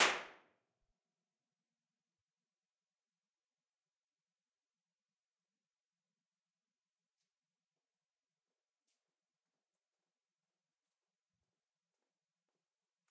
Spinnerij TDG Studio entrance
Studio entrance IR. Recorded with Neumann km84s. ORTF Setup.